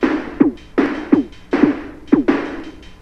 HM Loop 3
simple, minimal, bass, techno, industrial, electro, dance, loop